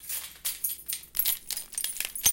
Keys jingling and hitting cement. Microphone used was a zoom H4n portable recorder in stereo.
ambiance, city